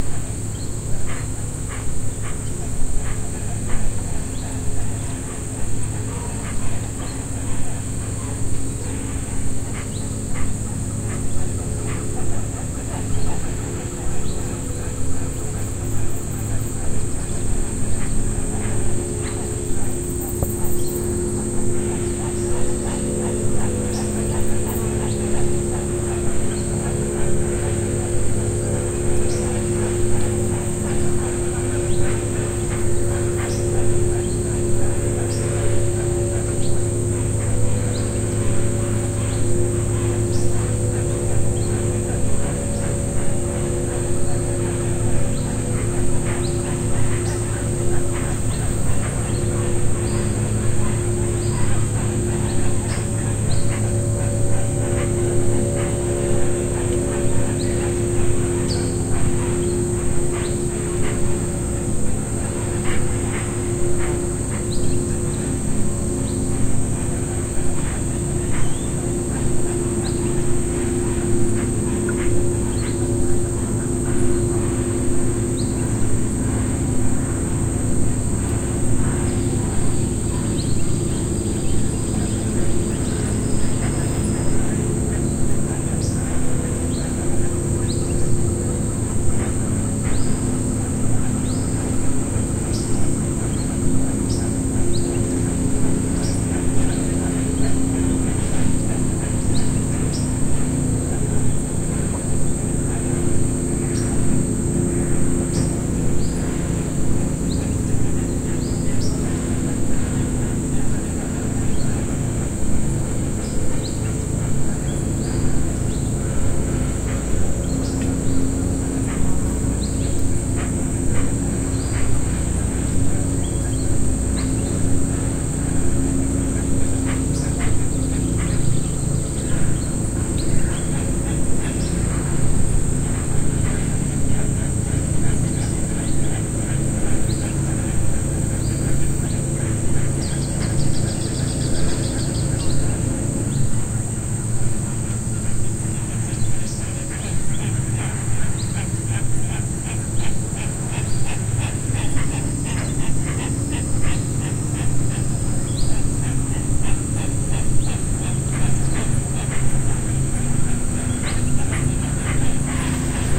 Afternoon ambience at the lagoon at the Hacienda Concepcion near Puerto Maldonado, Peru. Birds are close, and motorboats travel down the nearby Madre De Dios River, near the Tambopata River.
Amazon, Jungle, Rain Forest, Madre De Dios, Tambopata, River, bird, motorboat, insect, lagoon
recorded on 21 June 2014 with a Zoom H4. Very little processing (only gain adjustment).